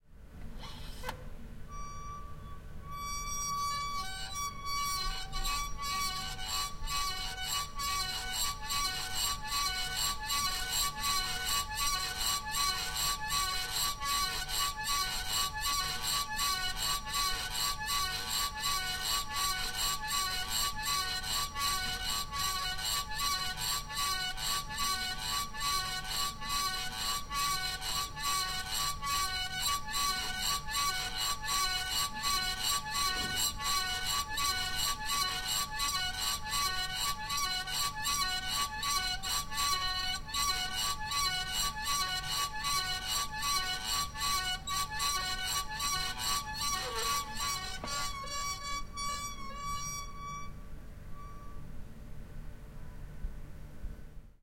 Old plastic ventilator squeaking in a window
An old plastic ventilator ist being opened and closed. You hear the blades spinning from the incoming air.
Recorded in Genoa, Italy.
vent, recording, weird, ventilator, fieldrecording, field-recording, air-conditioning, blowing, noise, conditioning, ventilation, squeeking, squeaking, field, blow, air, fan, wind